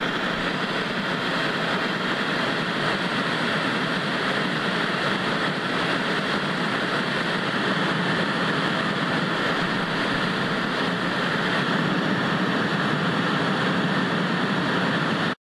TV Static
Just your basic old fashioned TV set sound when it's not on a channel....
static, television